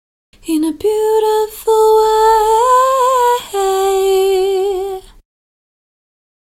female singing "in a beautiful way"
Dry clip of me singing "in a beautiful way". Reverb and chorus removed.
Recorded using Ardour with the UA4FX interface and the the t.bone sct 2000 mic.
You are welcome to use them in any project (music, video, art, etc.). If you would like me to hear it as well, send me a link in a PM.
More clips from this song coming soon.